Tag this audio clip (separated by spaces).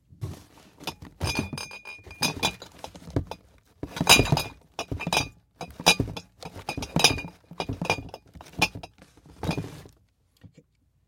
box-of-glass glass-tinging